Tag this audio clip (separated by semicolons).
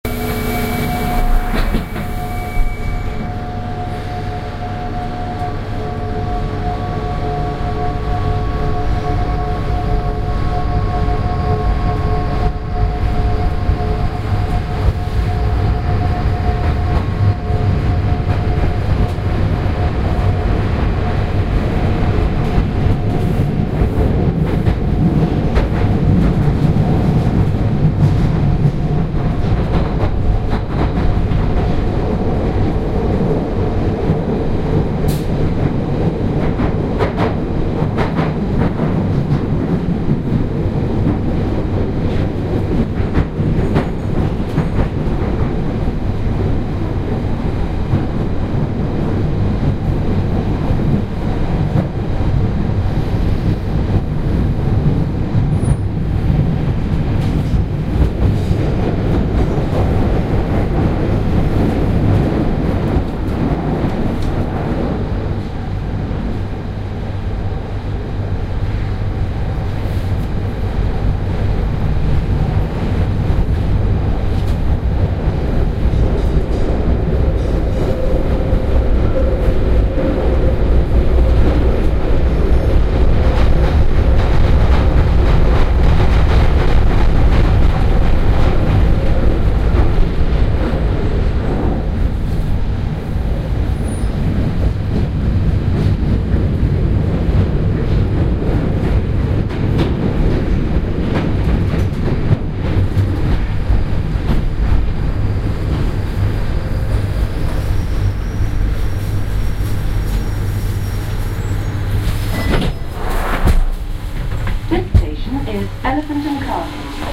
railway; rail; subway; binaural; platform; station; departure; london-underground; england; tube; transport; railway-station; underground; announcement; departing; metro; train; london; trains; headphones; train-station; arrival; field-recording